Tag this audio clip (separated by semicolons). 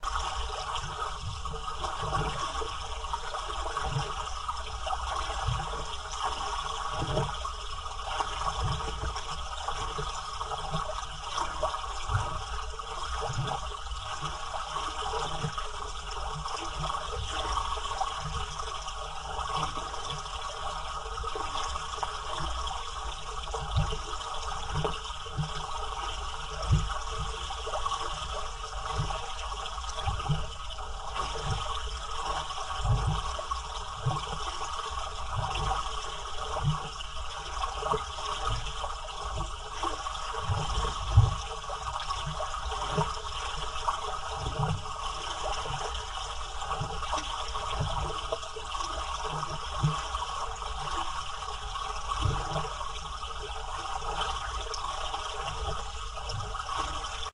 pipe; sound-effect; water-tank; movie-sound; ambient; water; field-recording; water-spring